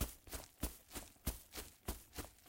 grass loop short
fast footsteps on grass for a video-game (non-realistic).
MKH60-> ULN-2.